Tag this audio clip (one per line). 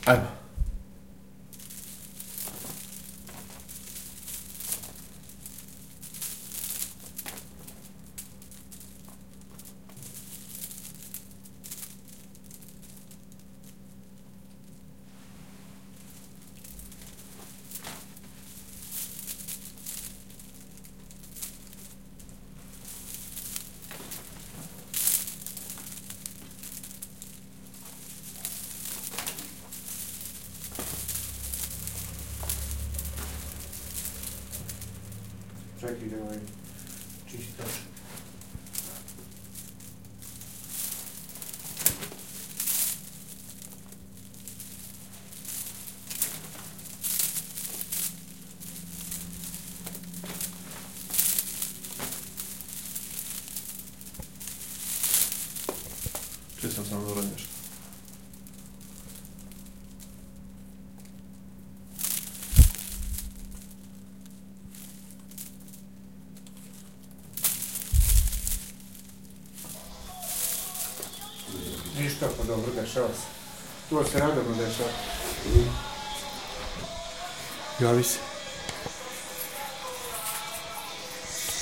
beaded
curtain